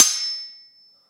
Sword Clash (22)
This sound was recorded with an iPod touch (5th gen)
The sound you hear is actually just a couple of large kitchen spatulas clashing together
clang, clanging, clank, clash, clashing, ding, hit, impact, iPod, knife, metal, metallic, metal-on-metal, ping, ring, ringing, slash, slashing, stainless, steel, strike, struck, sword, swords, ting